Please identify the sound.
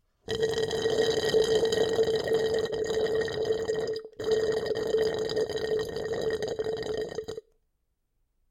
A long slurp of liquid through a straw from an almost empty soda cup
drink, slurp, straw, suck